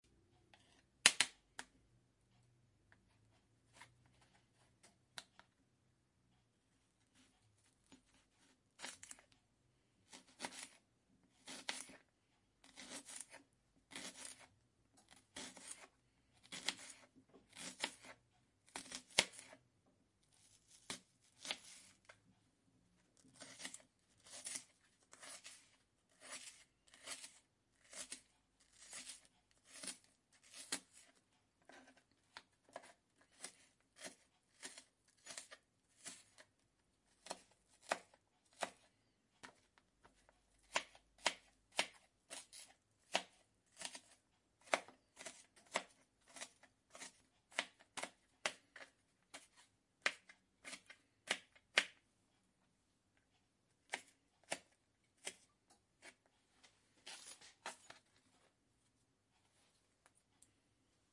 Cooking recording, from my kitchen, December 31st, Paris.
With "Zoom H2N".
cooking, field-recording, food, frying, kitchen